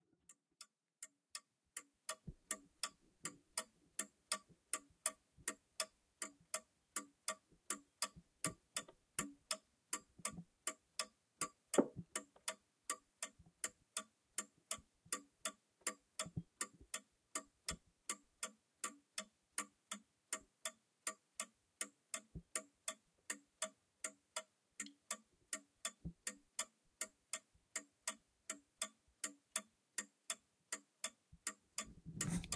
ClockAntique rx
The tick-tocking of an early 19th-century mechanical pendulum clock